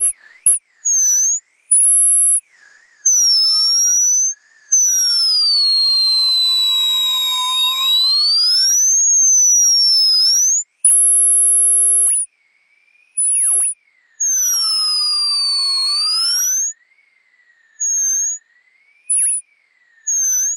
I was playing around with Xoxos VST plugin Fauna today, tweaking a dog sound to get more of a hurt dog yelling kinda sound. So I did some testing because I wanted more treble in the yell, and after some tweaks I had this painful sound. More of a dentist drill kinda sound. Enjoy!
ouch, tooth